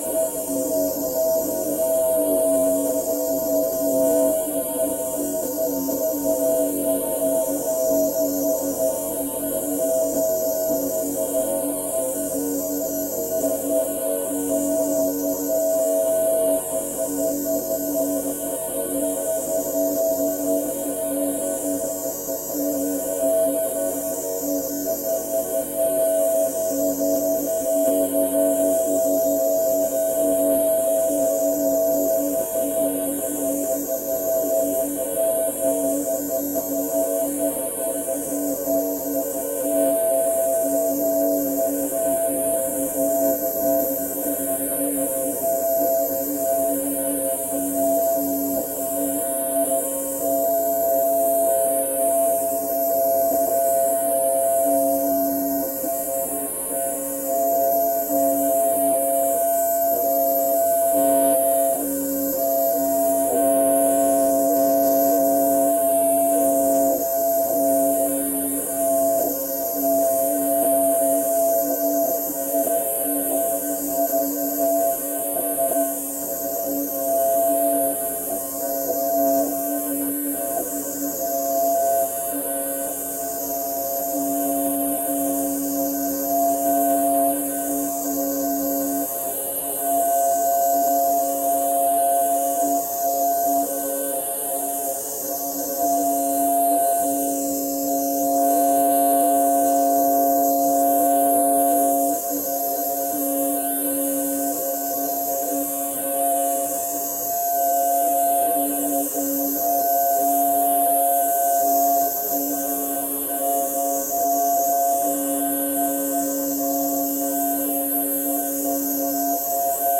The intense and violent, yet muted sounding of a complex digital whistle.
I am a software engineer specializing in low-code development, with a strong focus on building secure, scalable applications using Quickbase and cloud-integrated automation platforms.
intense, digital, whistle, muted